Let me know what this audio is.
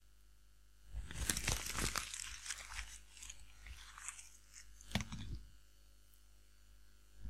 Curls up paper to a ball, and throws it away.
Recorded with a superlux E523/D microphone, plugged in a SB live soundcard. Recorded and edited in Audacity 1.3.5-beta on ubuntu 9.04 linux.
throw, paper, noise